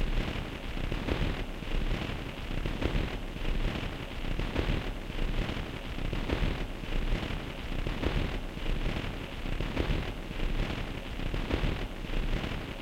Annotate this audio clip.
these are endgrooves from vinyl lp's, suitable for processing as rhythm loops. this one is stereo, 16 bit pcm